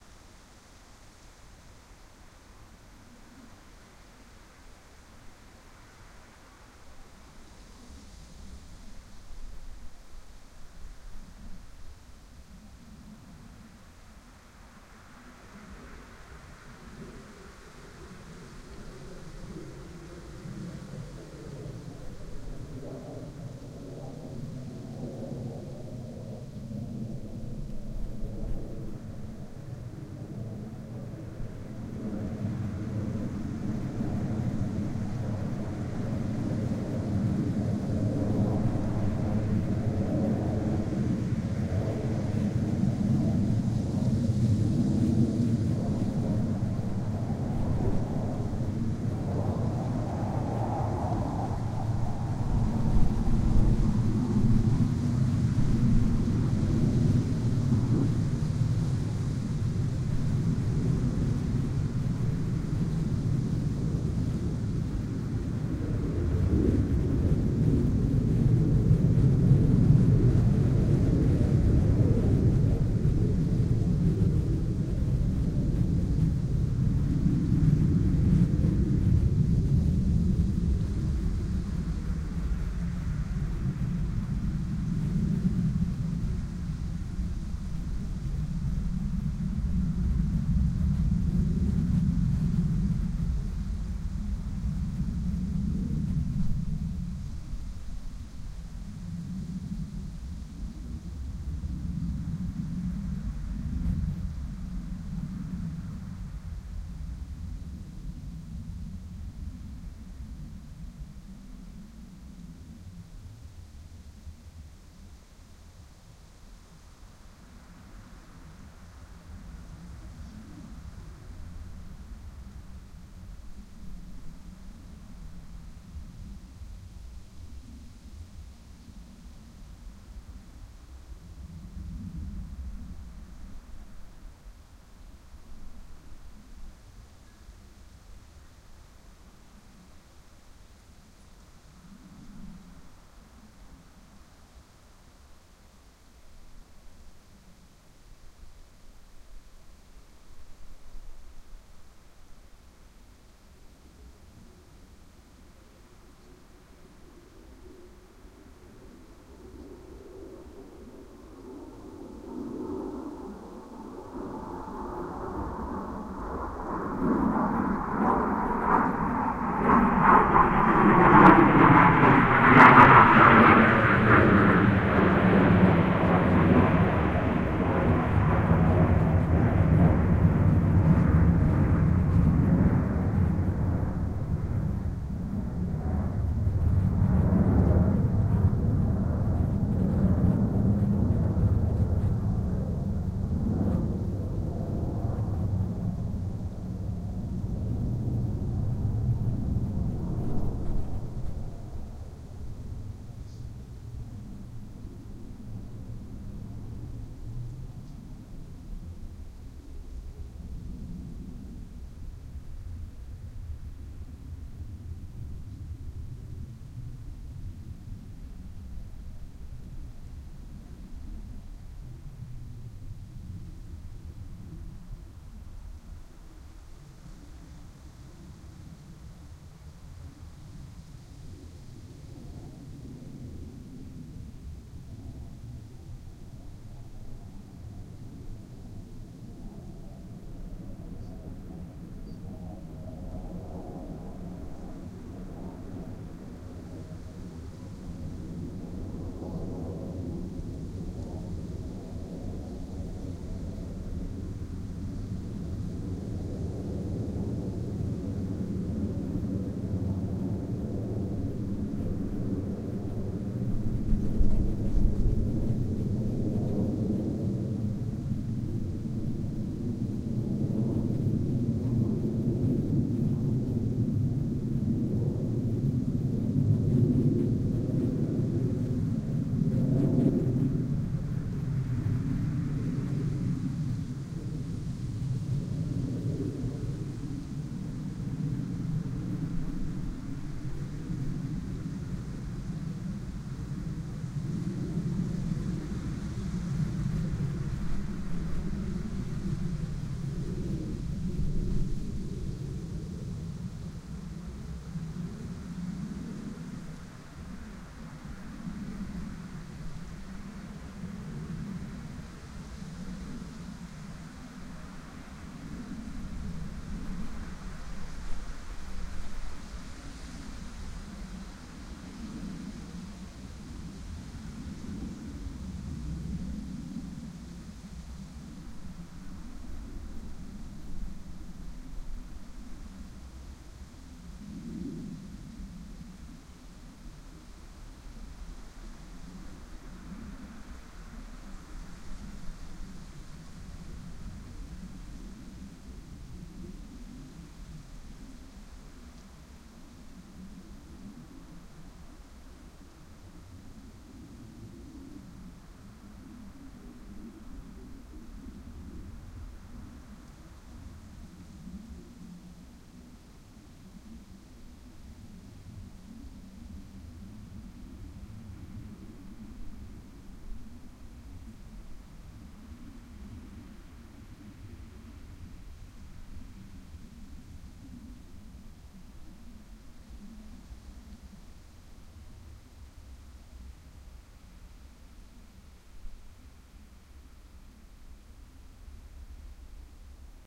File 7 / 10. F-16 Fighting Falcon flying low after midnight near Varde, in Denmark. F-16 pilots are practising dogfight and night flying all night through. This was cut out of a two hour long recording, there's a lot of wind at some points, but one definitely can hear the jets clearly. This lets you hear how it sounds when an F-16 passes by almost exactly over you. There's a good doppler effect and a nice depth to this recording.
Recorded with a TSM PR1 portable digital recorder, with external stereo microphones. Edited in Audacity 1.3.5-beta on ubuntu 8.04.2 linux.